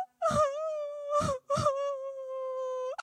a weeping woman